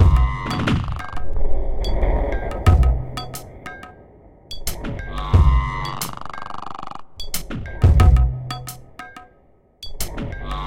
Produced for ambient music and world beats. Perfect for a foundation beat.